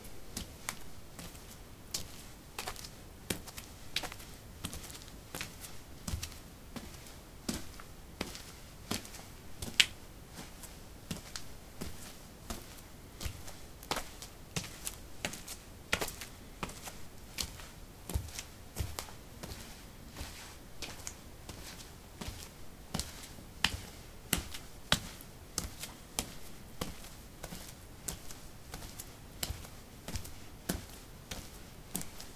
Walking on concrete: bare feet. Recorded with a ZOOM H2 in a basement of a house, normalized with Audacity.